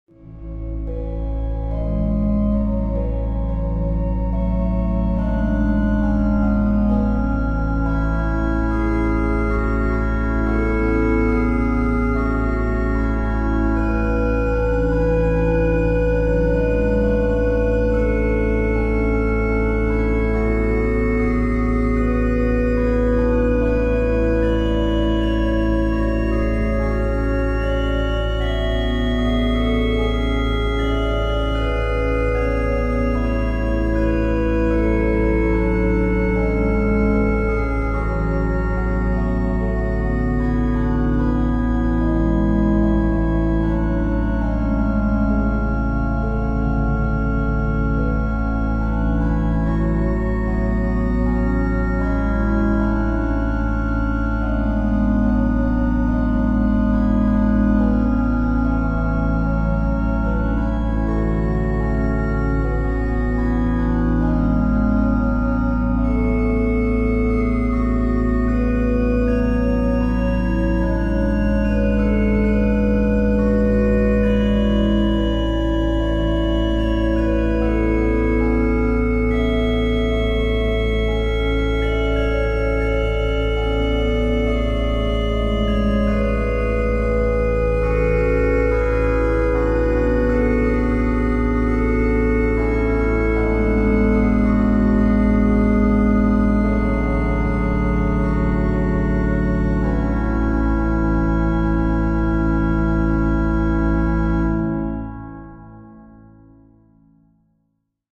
Organ Ambience, Calm, A
Experimenting with the Kontakt sampler, I loaded one of my lieblich gedeckt church organ samples and improvised a little ambience background melody. Add a cathedral reverb and this is the result.
An example of how you might credit is by putting this in the description/credits:
And for more awesome sounds, do please check out my sound libraries or SFX store.
The samples were recorded using a "H1 Zoom recorder".
Originally edited on 26th November 2017 in Cubase with the use of the Kontakt sampler.
ambience, church, lieblich